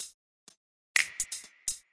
dance hall drum4 NO DRUM
Part of the caribbean delights pack, all inspired by out love for dancehall and reggae music and culture. Simply add a drums!
hat, snare, 4-bar